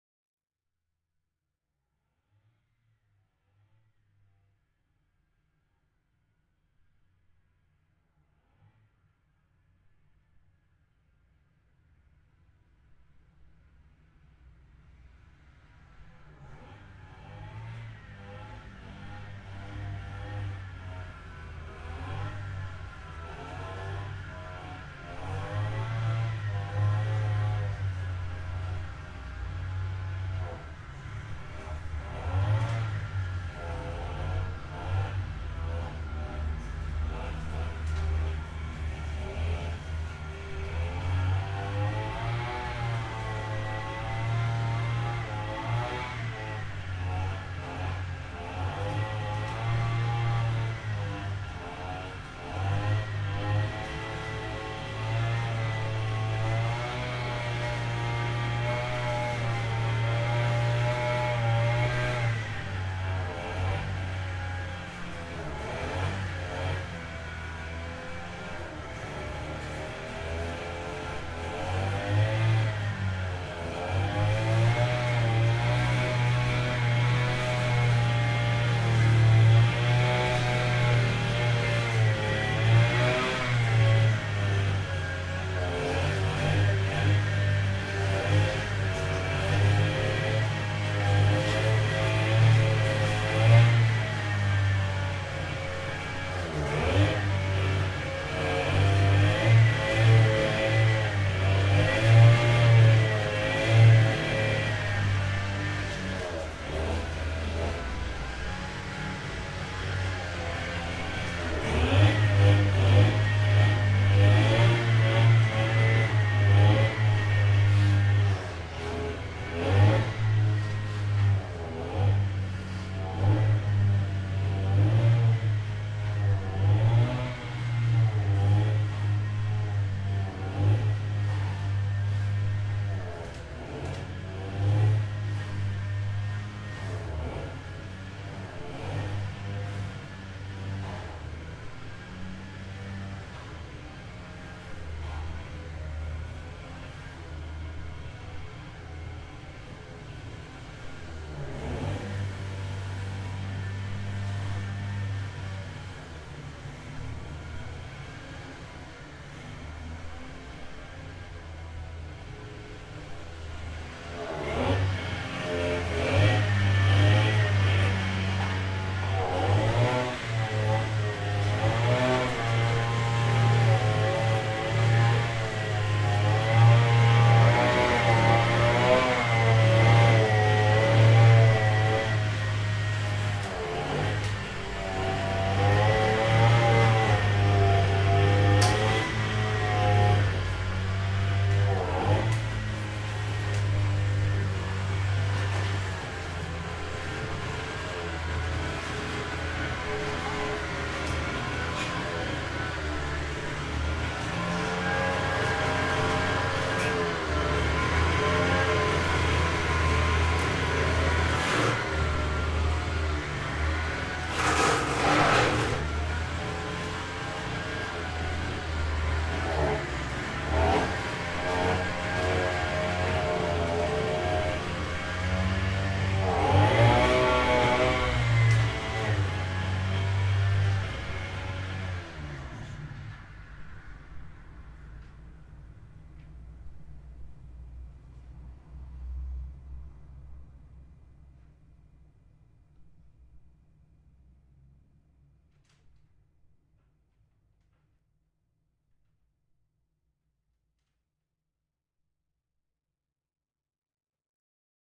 Field-Recording.LawnMower.3
Lawn mower being started and run in the neighbour yard